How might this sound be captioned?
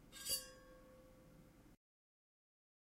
Megabottle - 28 - Audio - Audio 28
Various hits of a stainless steel drinking bottle half filled with water, some clumsier than others.
bottle, steel, hit, ting, ring